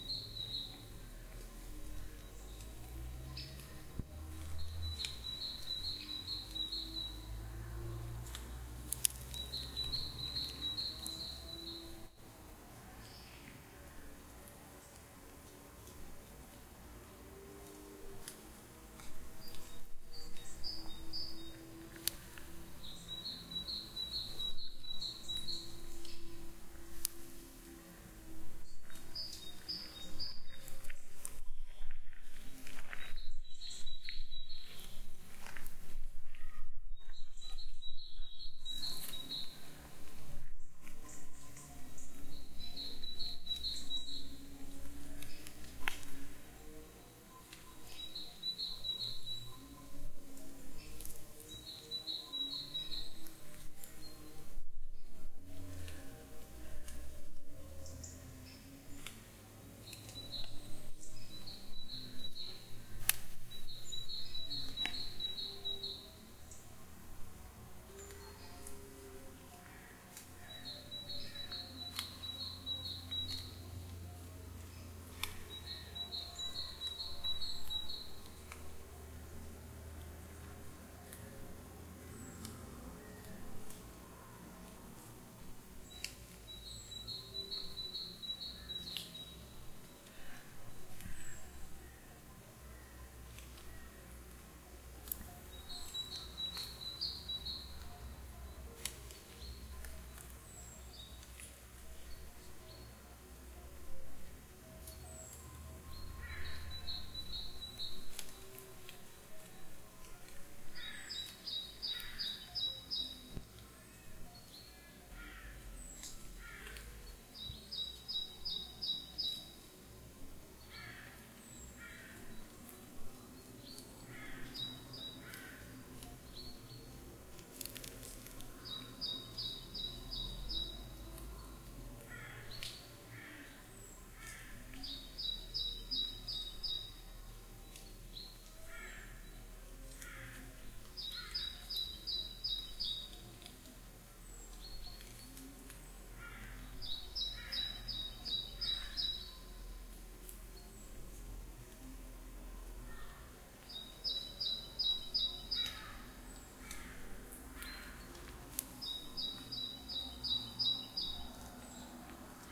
Relaxing ambience recorded at the Pagoeta natural reserve in Euskadi
Ambiente del parque natural de Pagoeta en Euskadi
Zoom H4n
park, pajaros, euskadi, natural, field-recording, reserve